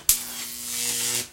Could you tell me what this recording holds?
Single arc of electricity going up a Jacob's Ladder.
This was taken from the audio track of a video shoot. Recorded with the internal microphone of a Sony DCR-TRV8 Handycam.
Still frame from the video:
unprocessed, crackle, electricity, flickr, fx, arc, buzz, electrical, effects, spark, electric, zap, shock